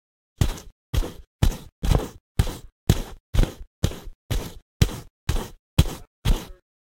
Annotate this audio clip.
Footstep Dirt
Footsteps recorded in a school studio for a class project.
feet, foot, footstep, footsteps, step, steps, walk, walking